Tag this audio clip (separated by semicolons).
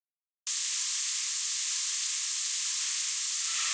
EDM; effect; Electric-Dance-Music; sample